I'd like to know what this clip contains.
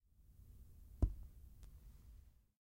The sound of someone tapping a touchscreen computer